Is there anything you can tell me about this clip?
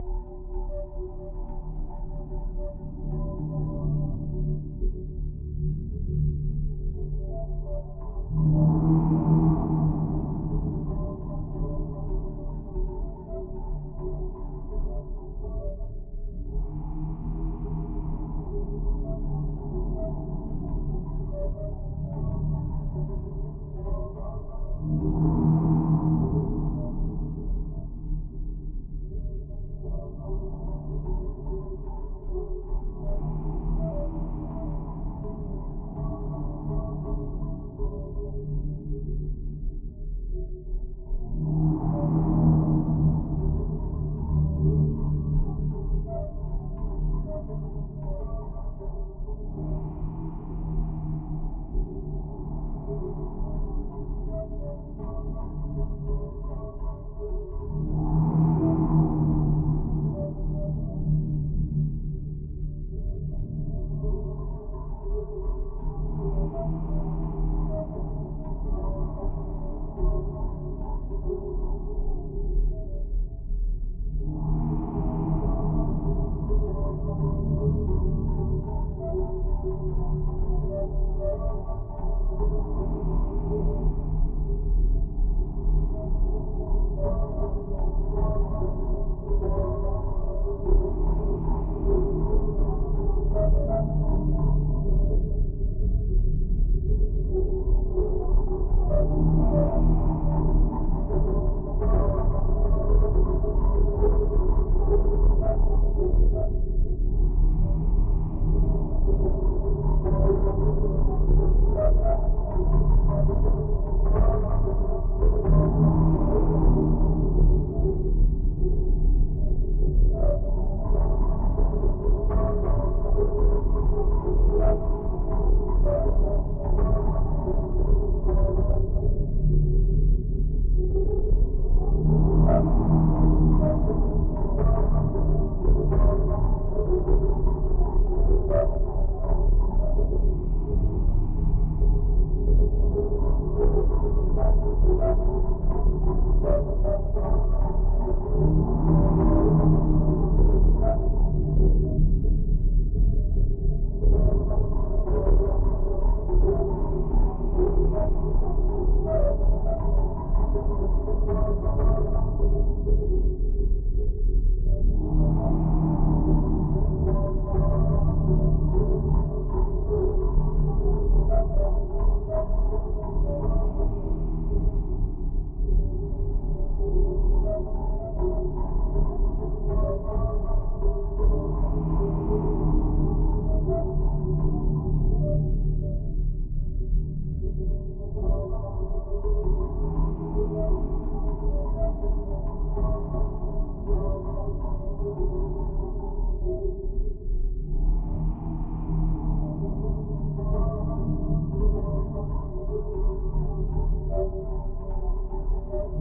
A nice Ambient i´ve made with padshop-pro.
If you wanna use it for your work just notice me in the credits.
For individual sounddesign or foley for movies or games just hit me up.